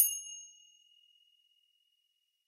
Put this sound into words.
finger cymbals side03
This pack contains sound samples of finger cymbals. Included are hits and chokes when crashed together as well as when hit together from the edges. There are also some effects.
bell, chime, cymbal, ding, finger-cymbals, orchestral, percussion